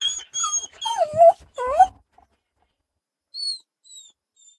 DOGFX BICHONFRISE CRY 02
animal, bichon, cry, dog, frise, pet